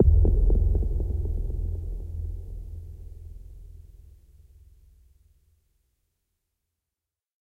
A deep hit with echo, perfect for trailer, drama or suspense. A classic. Commonnly used in blockbuster, made with vst.